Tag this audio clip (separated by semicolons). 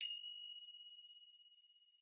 percussion; finger-cymbals; bell; cymbal; ding; orchestral; chime